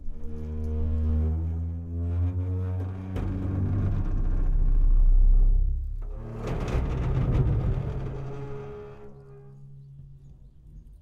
metal gate 10
Large metal gate squeaks rattles and bangs.
large, gate, rattles, squeaks, metal, bangs